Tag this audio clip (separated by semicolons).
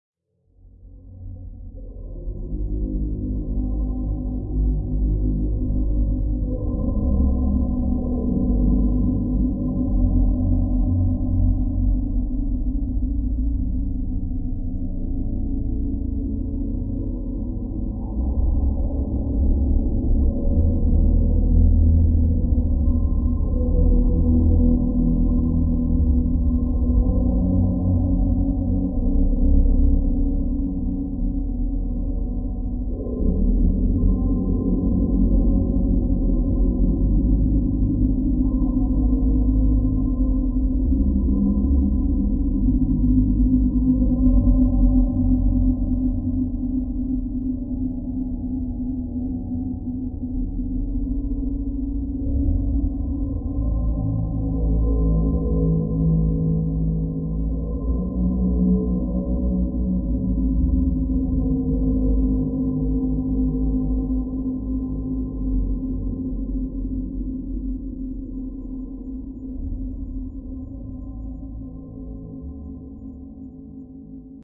underwater; ambient; soundscape